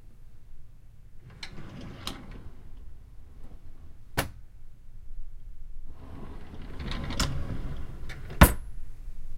Recording of a kitchen drawer (containing cutlery) opening and closing.